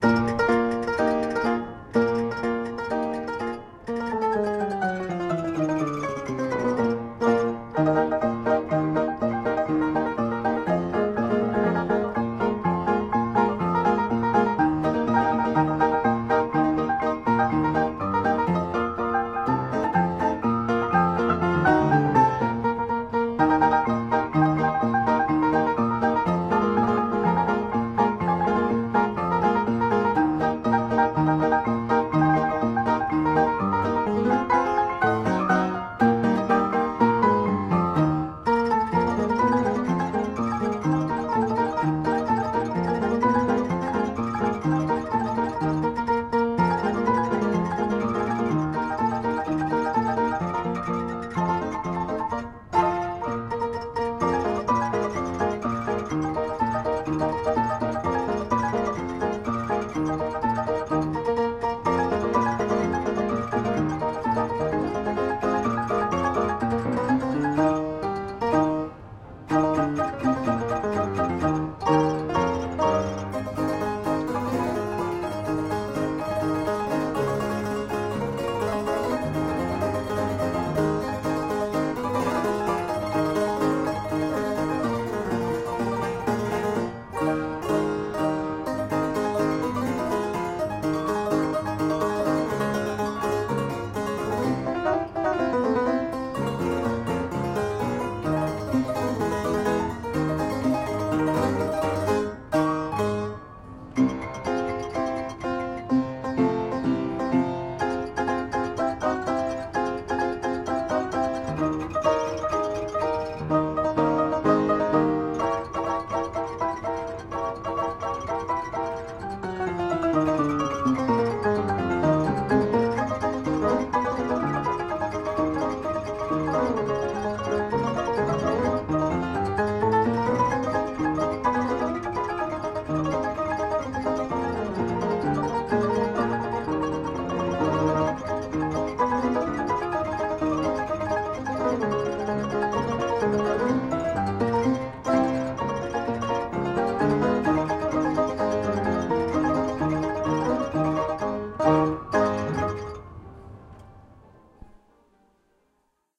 Old Fasioned Auto Piano
An old automatic piano like those found in saloons or entertainment facilities circa 1940s. Part of a collection at Musee Mechanique in San Francisco, operates on quarters and plays sounds through old paper reels with cutouts.